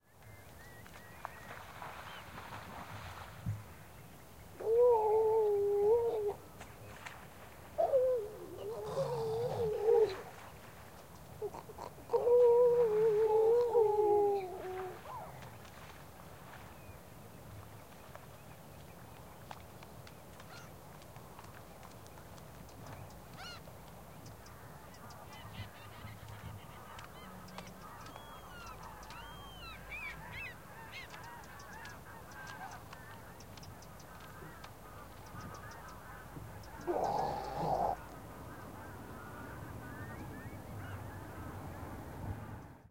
160904 seals at low tide
Seals resting contemplatively on the sandbanks of Scotish Loch Fleet near Dornoch at nearly low tide. Some of them splashing in the heavy tidal flow, others calling lazily.
birds, field-recording, nature, Scotland, seals, water